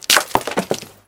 Breaking Glass 25
Sounds mostly like a piece of wood splintering because the glass is thick. Includes some background noise of wind. Recorded with a black Sony IC voice recorder.
break, tear, shatter, splintering, glass, smash, wood, crash, pottery, glasses, splinter, crack, breaking, shards